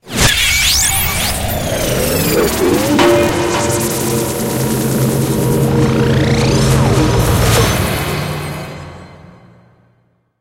Science fiction effect 10
Sound composed of several layers, and then processed with different effect plug-ins in: Cakewalk by BandLab.
I use software to produce effects:
woosh, scary, transformation, metal, hit, impact, morph, background, drone, destruction, opening, transformer, abstract, noise, glitch, game, metalic, horror, moves, stinger, rise, dark